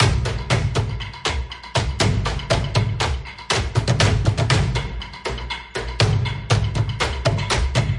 Percussion for action or dramatic films. 120 BPM